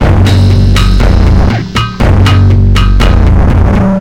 noise rhythm001
Weird tribal industrial rhythm. created when I was 15, mixed from sounds I made on a cheap guitar.
machine, rhythmic